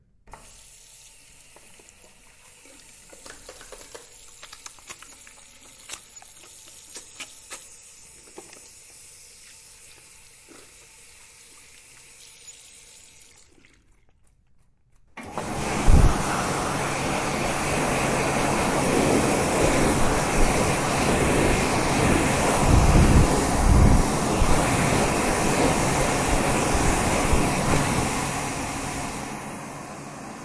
washing hands using a faucet in a bathroom.